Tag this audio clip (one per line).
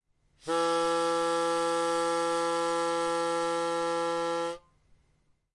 instrument
sampler
pipe
2
E
pitch
guitar